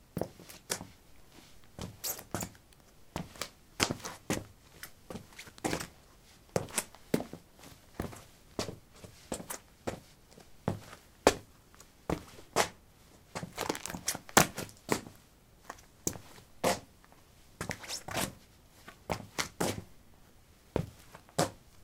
concrete 12b squeakysportshoes shuffle
Shuffling on concrete: squeaky sport shoes shoes. Recorded with a ZOOM H2 in a basement of a house, normalized with Audacity.
footstep, step, steps, footsteps